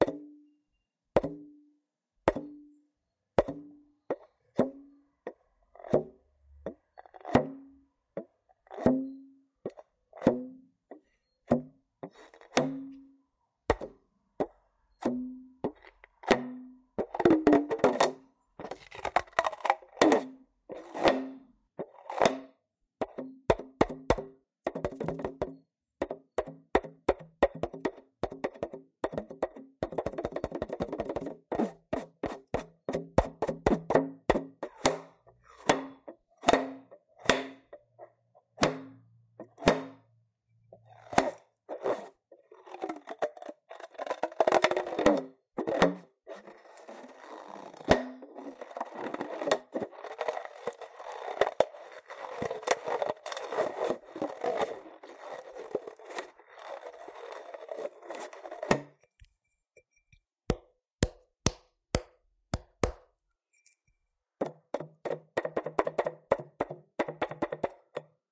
Baloon DIY Drum
Simple diy drum that I made using a baloon (:
Baloon, Drum, Drum-Kit, h5, Zoom, DIY, Drums